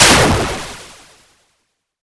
Generated with SFXR. 8 bit sounds for your sound/game designing pleasure!
8, SFX